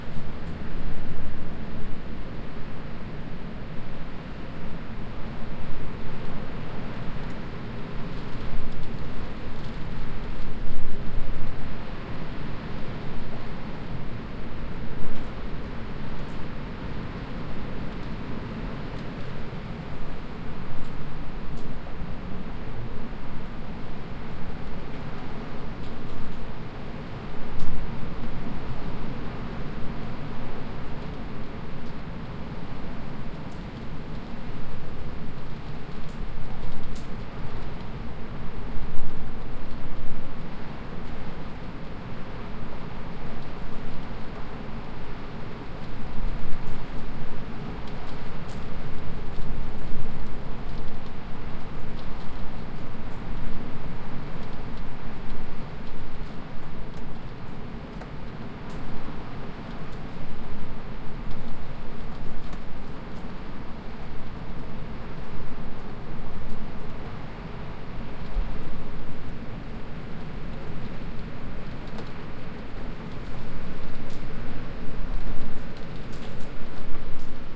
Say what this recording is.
porto 22-05-14 large waves during a storm, wind
Breaking waves in a stormy day with wind, sand beach